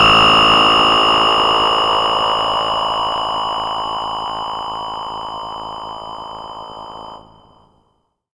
MemoryMoon lazer treathment
This is part of a soundesign work for the new memorymoon vsti that emulate the legendary Memory Moog synthesizer! Released after 15 moths of development by Gunnar Ekornås, already known for the amazing work on the Arppe2600va and Minimogue as member of Voltkitchen crew.
The pack consist in a small selection of patches from a new bank of presets called "moon mobile bank", that will be available as factory presets in the next update ..so take it just like a little tease.
The sound is robotic sound effects. Onboard effects, no additional processing.
alien, analog, cyborg, effect, electro, electronic, feedback, fx, hi-tech, laser, moog, retro, robot, sci-fi, sound-effect, soundeffect, soundesign, space, synth, synthesizer, talkbox, transformers, vintage, weapon